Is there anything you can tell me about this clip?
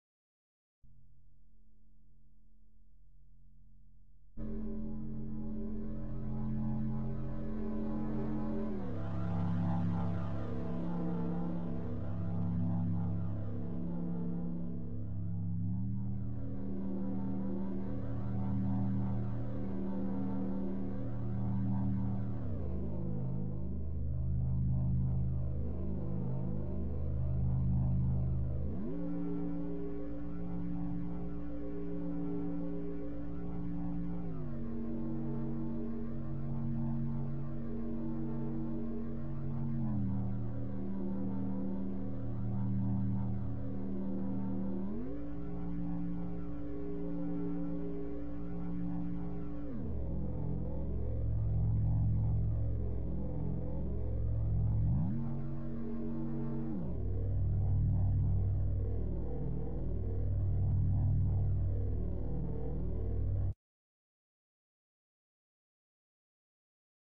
Darkened sound #3
A dark drone, useful as a pad or a sound texture that can be mixed and further manipulated
drone, synth